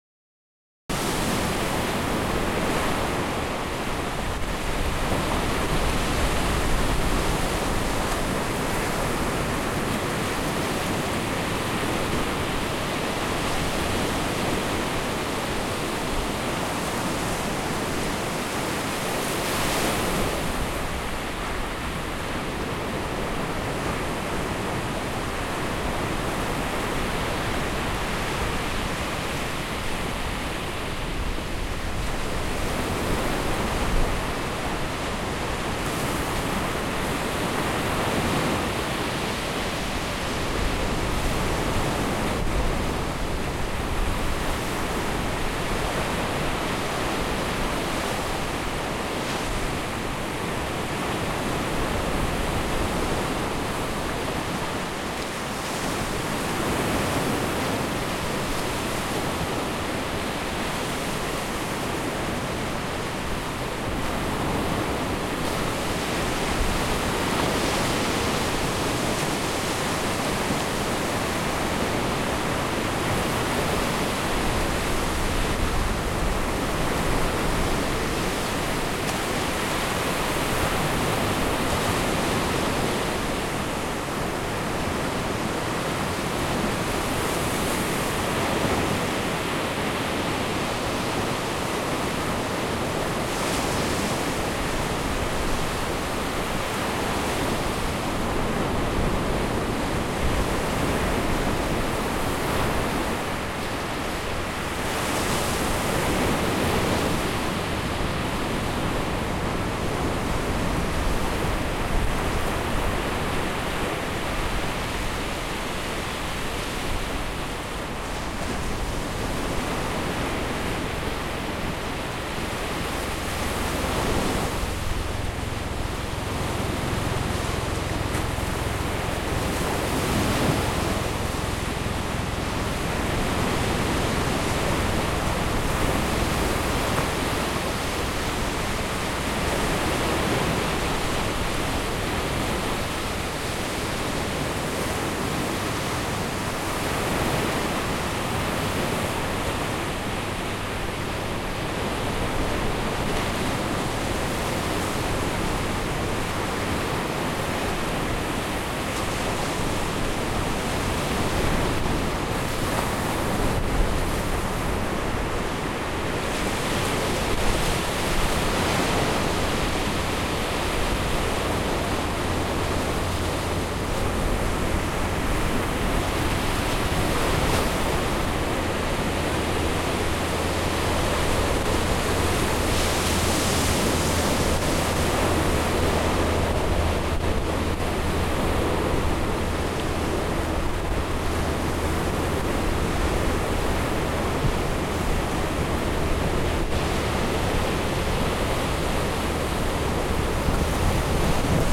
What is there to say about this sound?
sea waves shore1length 03 21
Recorded on the shore of gjæren in Norway with zoom h4 summer of 2015
no hipassfilter in on this recording,recommend 80hz cut
airplane towards end of recording,far distance,
Atmos, Fieldrecording, oscean, waves